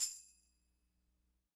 Crub Dub (Tambourine 1)
HiM, Rasta